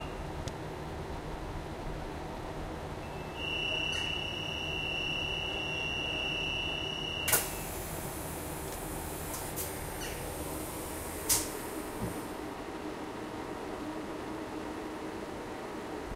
Pneumatic train door shutting.
Field recording in a Dutch train.
Recorded with a Zoom H1 recorder.